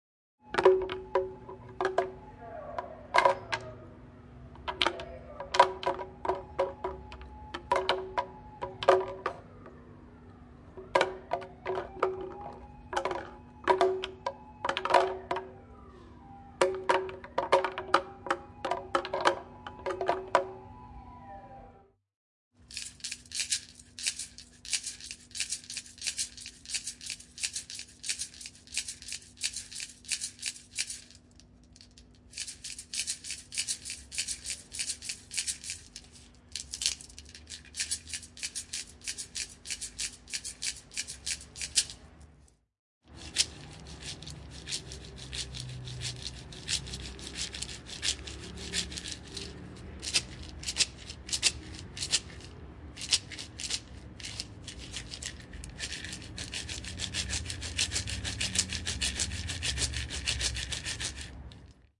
A Chinese hand drum and two maracas recorded in a Tokyo drum museum on a Zoom H4 in May 2008. Light eq and compression applied in Ableton Live. Bit of street noise at the start, but if you like police sirens with your drums....